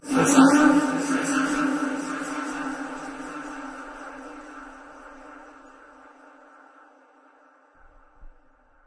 more suprises
fx, voice